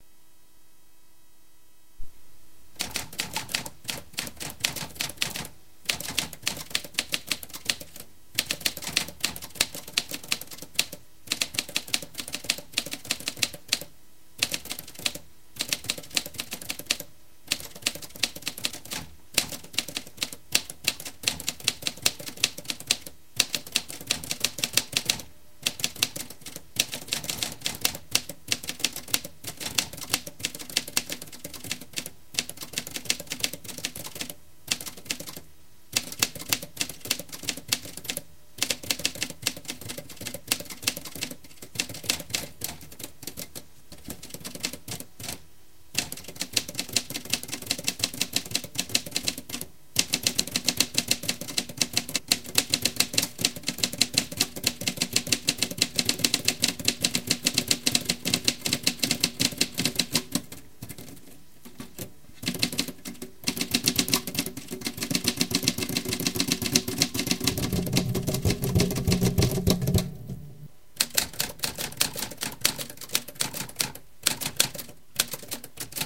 recording of typing on a manual typewriter, a couple of small jams